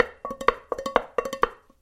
Stomping & playing on various pots
0, egoless, natural, playing, pot, rhytm, sounds, stomps, various, vol